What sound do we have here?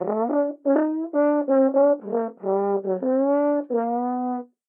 An edited recording of crashoverride61088 on trombone, edited to sound like the teacher Ms. Othmar from the Peanuts series. Recorded with an AT-4040, Pro Tools 10, and some light EQ.
Sweep the sound together!